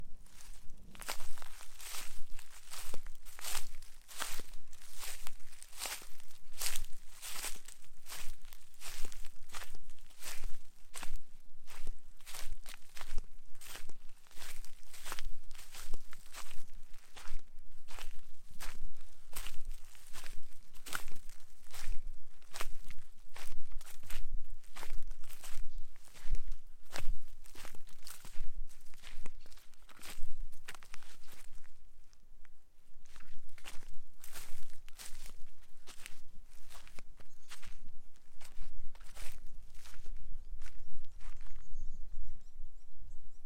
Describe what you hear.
footsteps grass forest
Walking around on a forest floor with footsteps
forest, slippers, footsteps, ground, foley, Sweden